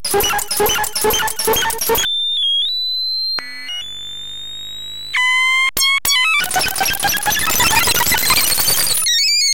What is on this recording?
cartoon-like loop made with Native Instruments Reaktor and Adobe Audition
industrial, loop, glitch, sound-design, electronic, noisy, 2-bar, squeaky